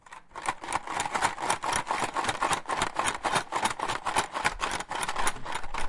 shaking pencil map